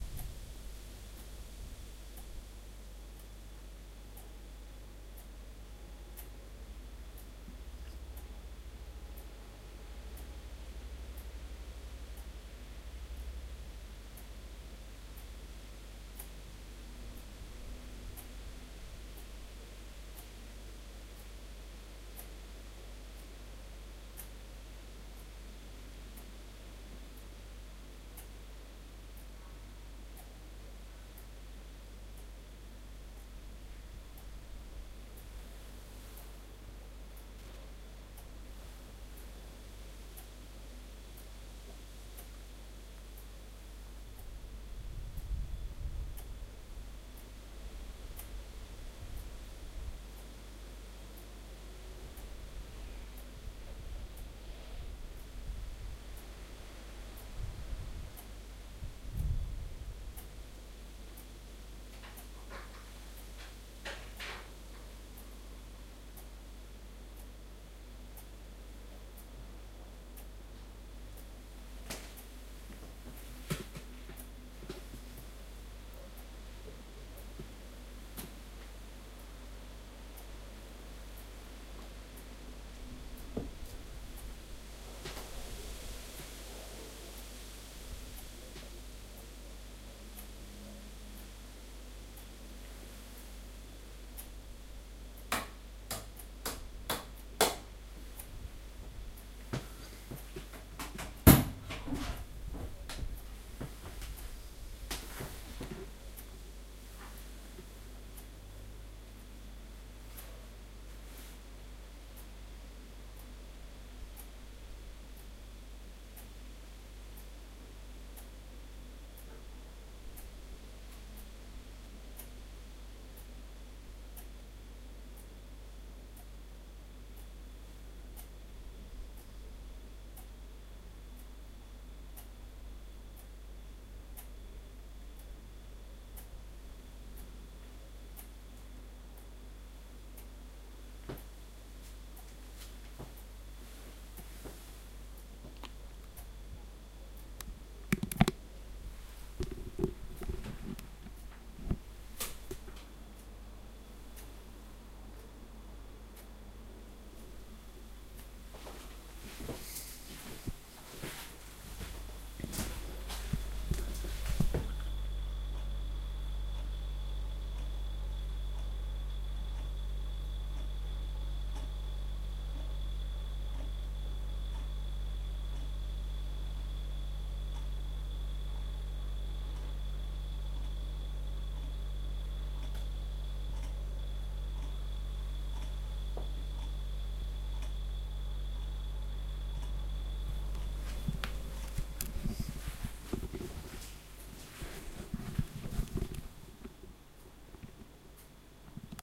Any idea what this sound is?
midnight clock
Midnight recording. I wanted to record really the most basic sounds in my kitchen. Clock on the wall, the fridge's sound ... It was totally quiet, but not still not ... !